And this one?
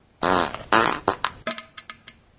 crackly fart
an old fart
poot, explosion, laser, space, car, flatulence, fart, flatulation, weird, gas, race, noise